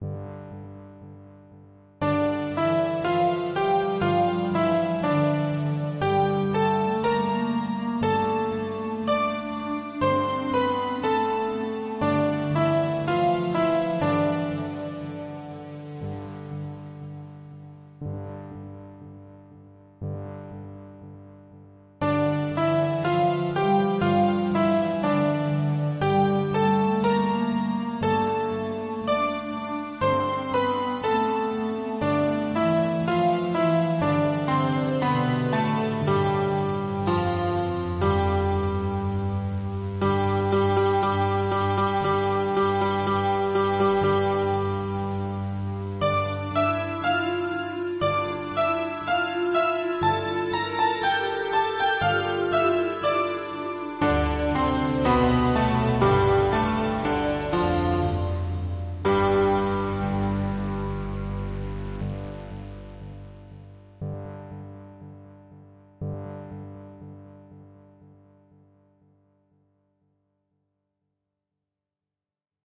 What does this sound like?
A little bit of cinematic music, fit for a movie of galactic proportions.
If you would like to use this in one of your projects, please send me a link to the final product. I would love to see how its being used.
This sound clip was created using virtual instruments included in Mixcraft 5.